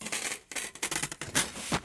Crumple and dirty hits